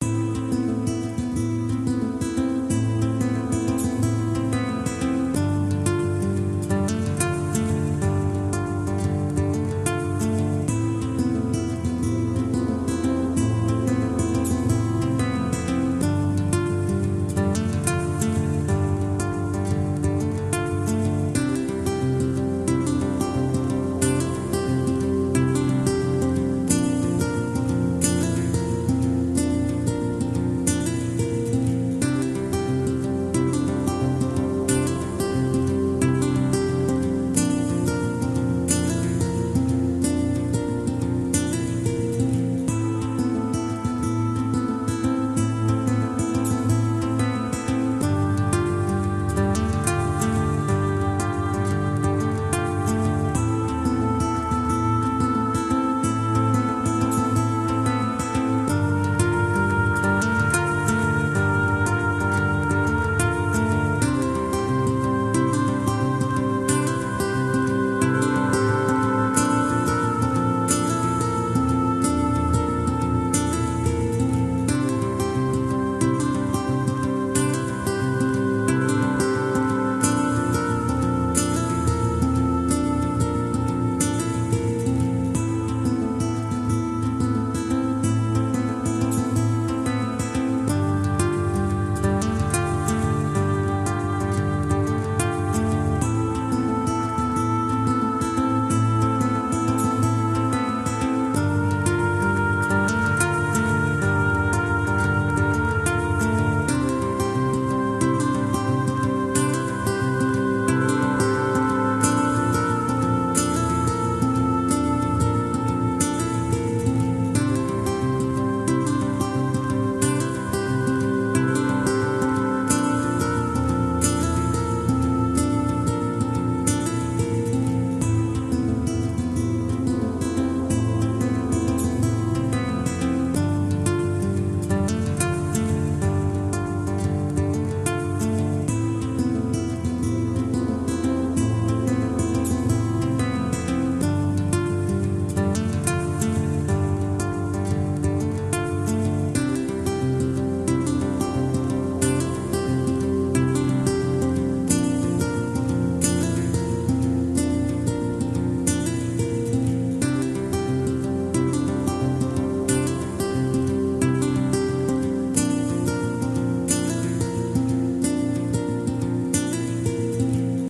Just a short ambience track which was meant for a game which was never produced.
Made it in MagixMusic Maker with some buildt in samples.